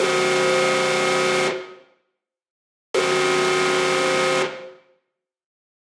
Synth Alarm
I was messing around with a synth, and it kinda reminded me of an alarm sound you might hear in a big base/spaceship in some videogame or movie.
synth, synthetic, alarm, spaceship